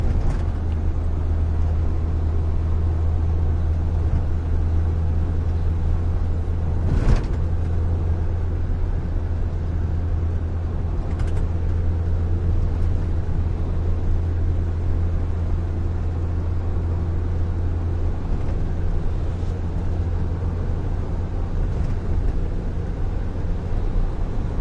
auto truck van ride int driving high speed highway engine purr
auto, driving, high, highway, int, ride, speed, truck, van